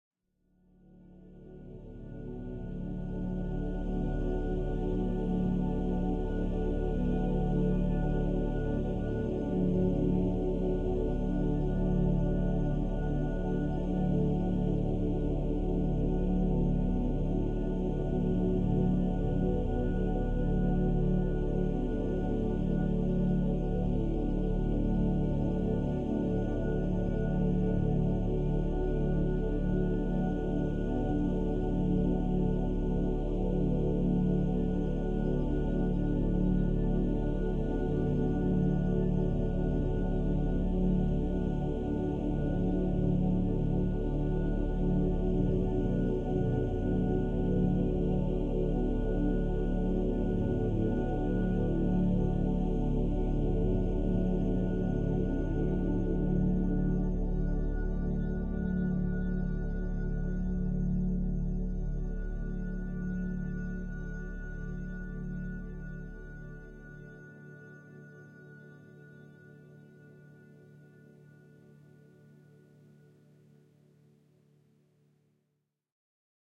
Calm, meditation pad